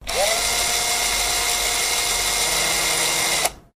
Hand held battery drill 02
Hand held battery drill
Recorded with digital recorder and processed with Audacity